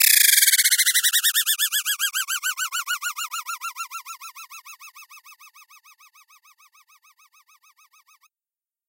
Simple FX sounds created with an oscillator modulated by an envelope and an LFO that can go up to audio rates.
LFO starts almost at audio rates but the FM level was kept quite low. Some post-processing was used on the sound, sounds like a chorus.
Created in Reason in March 2014
audio-rate, FM, frequency-modulation, FX, LFO, oscillator, Reason